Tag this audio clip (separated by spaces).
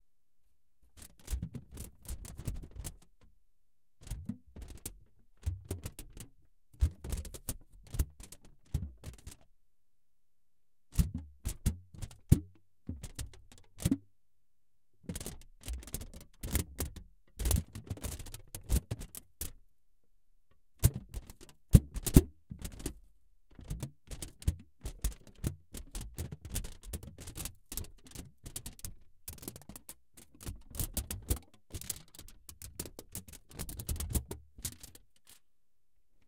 noise rustle thump